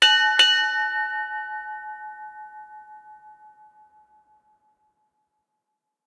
As early as the 15th Century a bell was used to sound the time on board a ship. The bell was rung every half hour of the 4 hour watch.Even numbers were in pairs, odd numbers in pairs and singles.